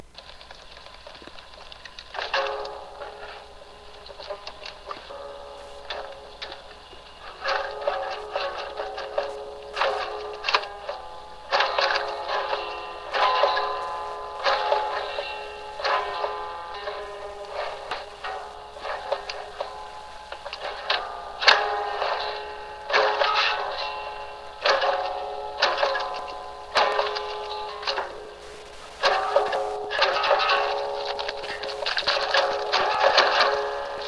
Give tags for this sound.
guitar lo-fi loud noise

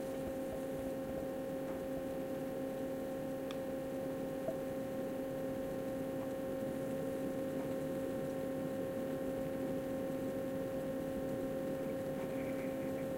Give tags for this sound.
computer electrical electronic fan hum machine mechanical noise

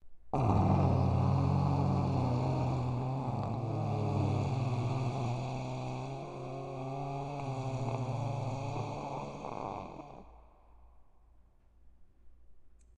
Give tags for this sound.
Growl Monster Creature Horror Zombie Scary

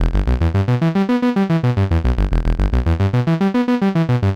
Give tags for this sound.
synth moog-grandmother electronic moog

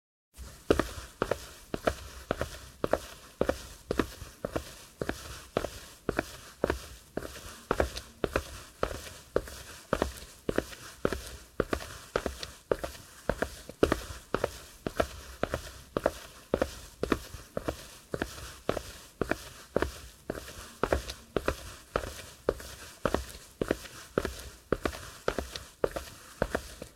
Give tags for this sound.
steps walks walk footsteps shoes walking cobblestone ground stone feet city sidewalk

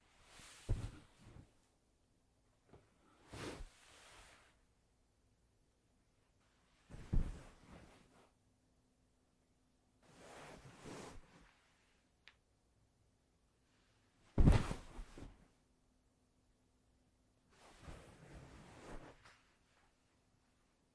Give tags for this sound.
couch stand

